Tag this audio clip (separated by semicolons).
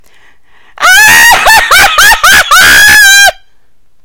giggle; female; laughing; laugh